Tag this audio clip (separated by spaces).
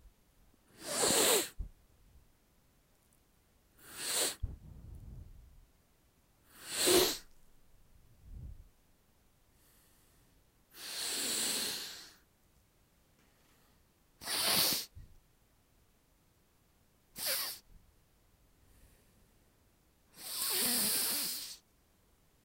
snorting cocaine sniff powder nose